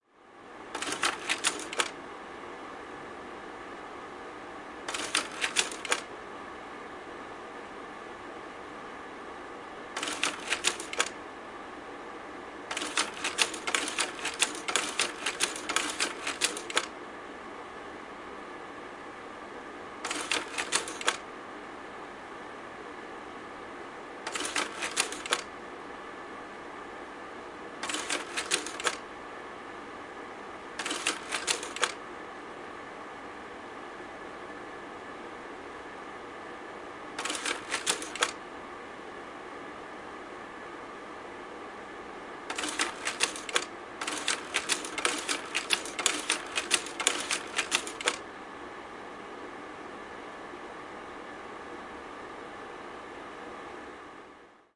Diaprojektori, projektori / Manual slide projector, changing slides, single and serial changes, clicks, fan humming
Käsikäyttöinen projektori, yksittäisiä kuvanvaihtoja, raksahduksia, välillä sarjassa, tuulettimen huminaa.
Äänitetty / Rec: Analoginen nauha / Analog tape
Paikka/Place: Suomi / Finland / Helsinki, Yle Studio
Aika/Date: 2001
Diaprojector, Diaprojektori, Field-Recording, Finland, Finnish-Broadcasting-Company, Projector, Projektori, Slide-projector, Soundfx, Suomi, Tehosteet, Yle, Yleisradio